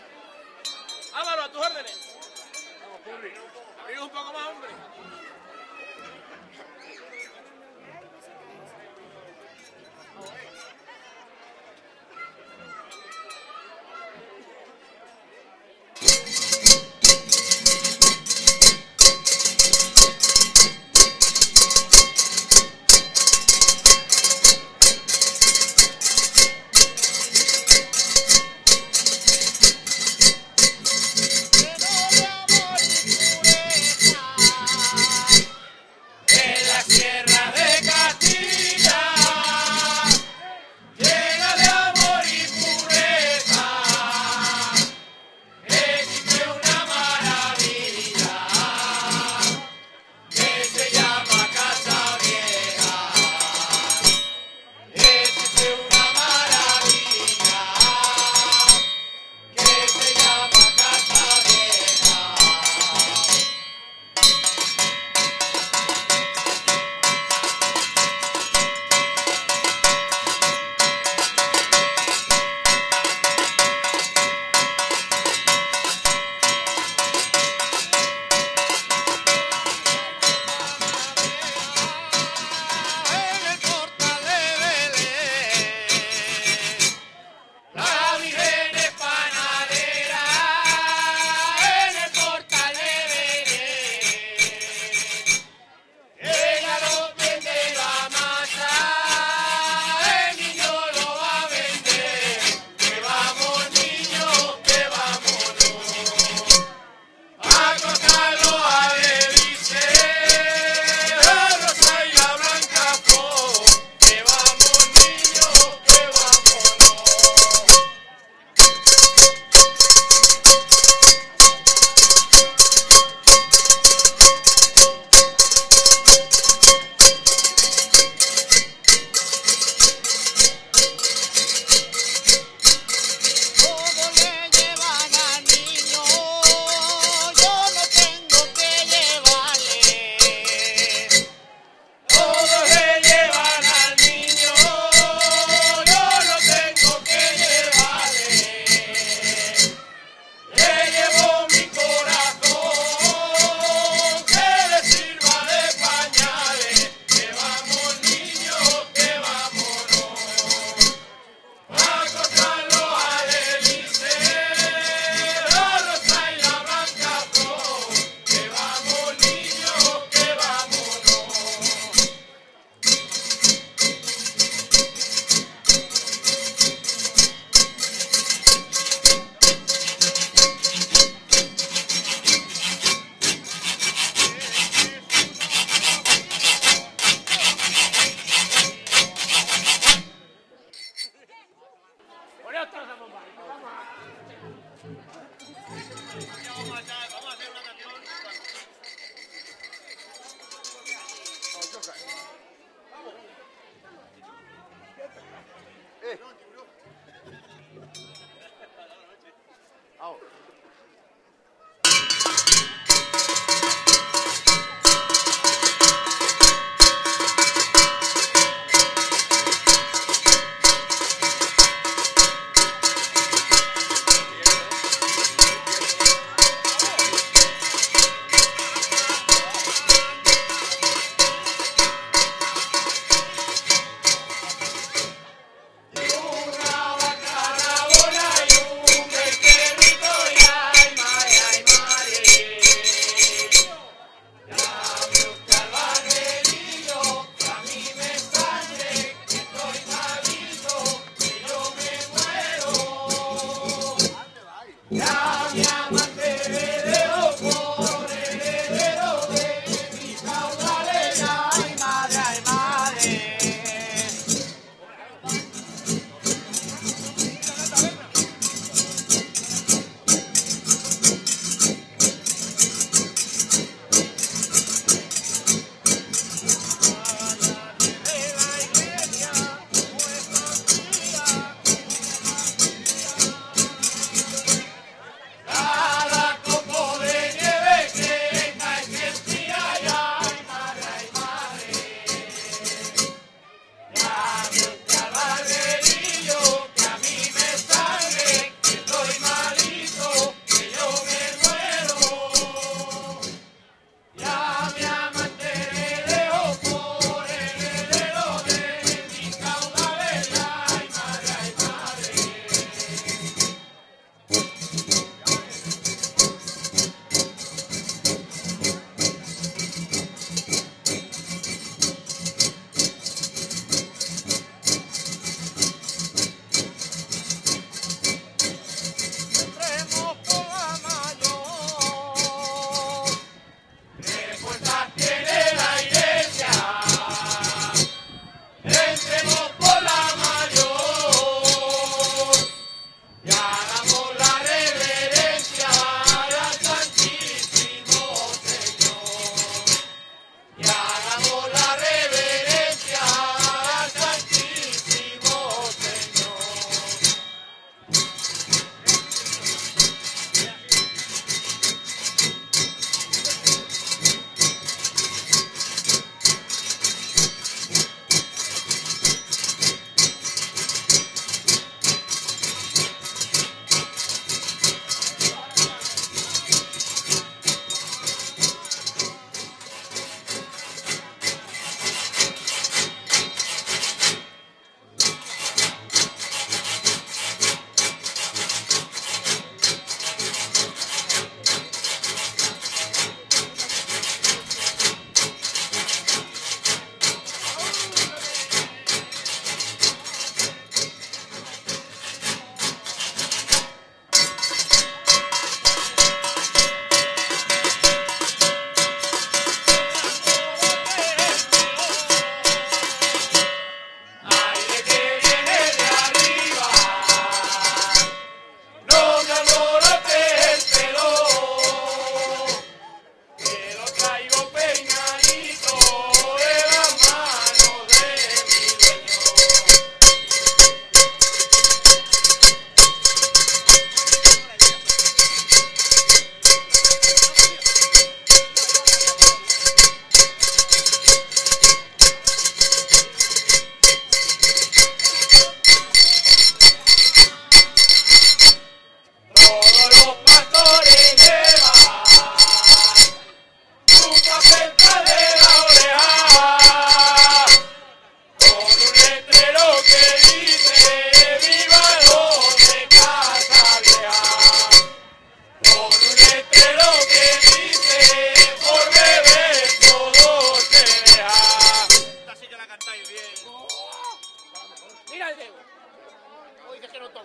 En las tradiciones de pueblos de Castilla y en otros lugares las cualidades sonoras de los instrumentos de cocina eran utilizados musicalmente, en este audio, de los Pastores de Casavieja, podemos escuchar varios de ellos.
Durante la grabación el micrófono se acercó sucesivamente a cada instrumento para resaltarlo en un primer plano sonoro y poder distinguir separadamente su particular sonido, por eso hay cambios de volumen.
Instrumentos: calderillo, zambomba, almirez, bandeja, botella de anís, percutidos o frotados con cucharas, llaves, tenedores; acompañados de voz como era su normal uso.
El sonido fue captado en la calle durante las fiestas navideñas 2014.
In the traditions of towns of Castile and elsewhere the sonic qualities of cooking instruments were used musically, in this audio, of Shepherds of Casavieja, we can hear several of them.